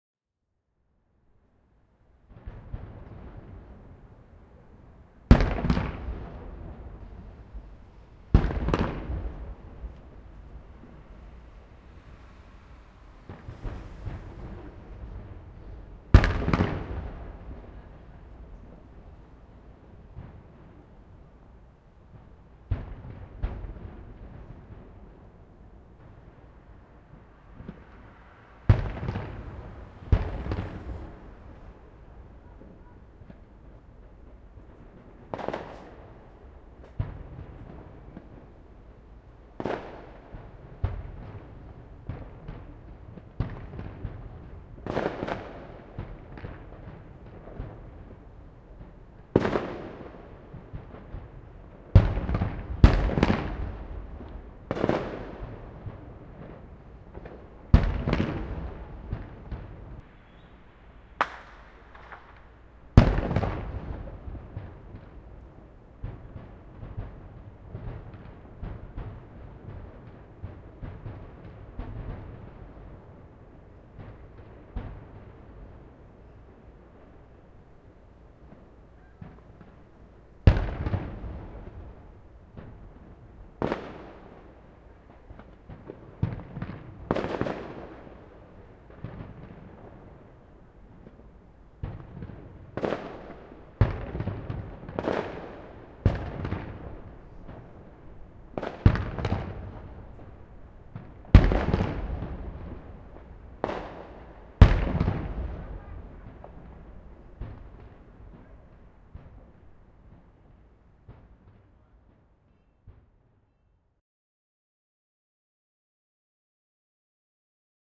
Distant fireworks outdoor in Ottawa
ZoomH1
2015
city, fireworks, distant, loud, fire, work, explosion, hit, far